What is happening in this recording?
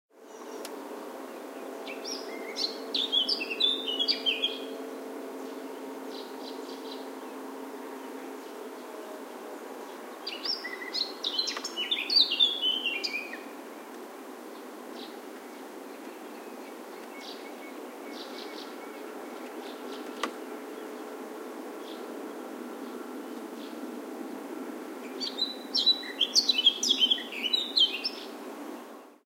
garden warbler02
Close-up song of a Garden Warbler. Some other birds in the background. Recorded with a Zoom H2.
birds, forest, morning, norway, songbird, warbler